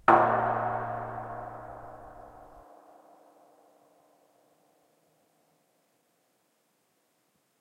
propane tank deep hit 1
Field recording of approximately 500 gallon empty propane tank being struck by a tree branch. Recorded with Zoom H4N recorder. For the most part, sounds in this pack just vary size of branch and velocity of strike.
field-recording, hit, metallic, propane, reverberation, tank, wood